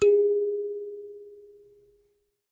Kalimba (note G# + harmonics)
A cheap kalimba recorded through a condenser mic and a tube pre-amp (lo-cut ~80Hz).
Tuning is way far from perfect.
thumb, kalimba, ethnic, african, instrument, piano, thumb-piano